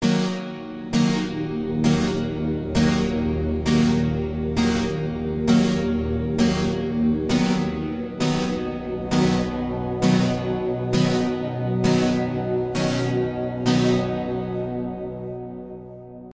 Krucifix Productions 2018 Run For Your Life
I created this music for filmmakers and video editors.
cinematic, creepy, design, drama, dramatic, fear, film, films, haunted, movie, movies, music, nightmare, scary, scoring, sinister, sound, sounds, soundtrack, spooky, suspense, terrifying, terror, thrill